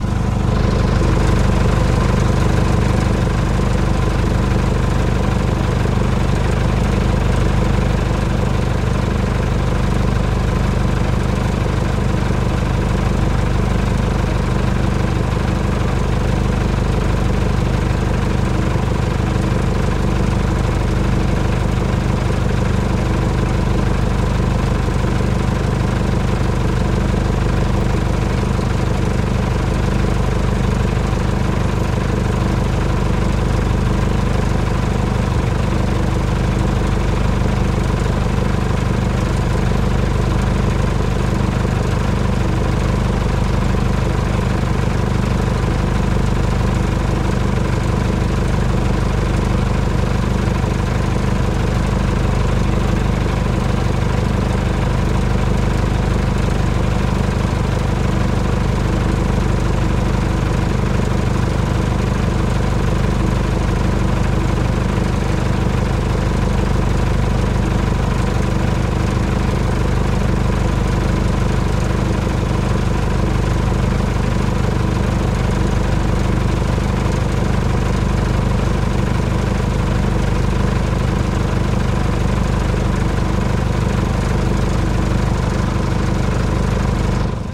idle mitsubishi canter lorry truck in traffic jam
Mitsubishi Canter - medium lorry truck idle engine in Moscow traffic
automobile, car, engine, idle, lorry, Moscow, motor, Russia, truck, vehicle